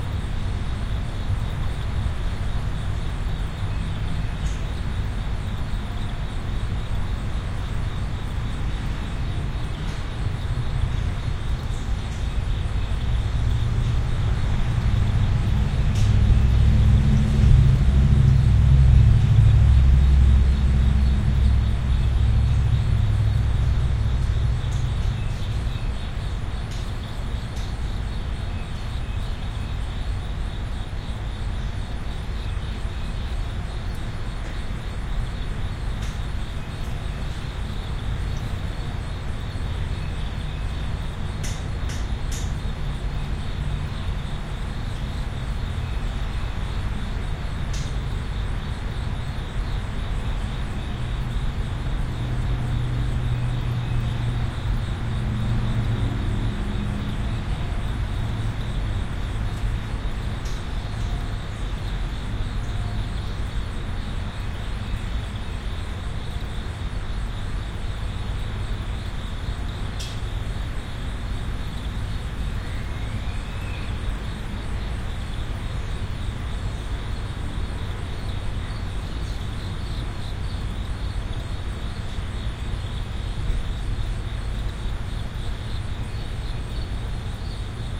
A typical backyard in South City St. Louis.